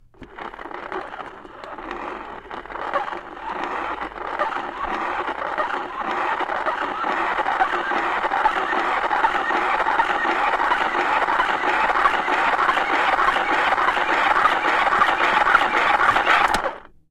I found a busted-up See-N-Say in a thrift shop in LA. The trigger doesn't work, but the arrow spins just fine and makes a weird sound. Here are a bunch of them!
recorded on 28 July 2010 with a Zoom H4. No processing, no EQ, no nothing!